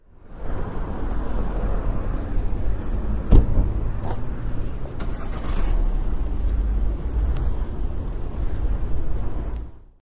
Someone gets into his Volkswagen Golf ....Diesel and starts the engine. Recorded with an Edirol R09 in the inside pocket of my jacket in October 2006.
engine, field-recording, human, noise, street, street-noise, traffic, volkswagen